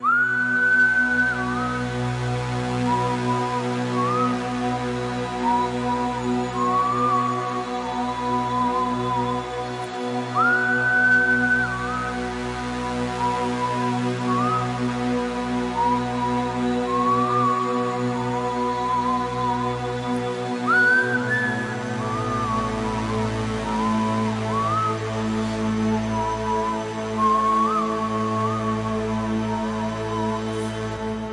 Just whistling with added synth. testing. may become part of a pack.
fear, phantom, creepy, patriotic, ghost, thrill, suspense, specter